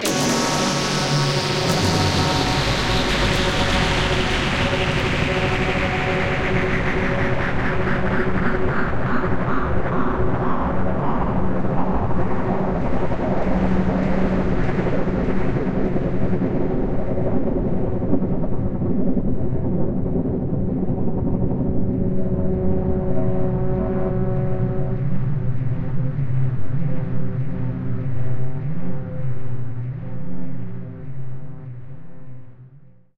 Massive drop
Big sting that peacefully concludes
filtered, slowing, mechanical, down, vast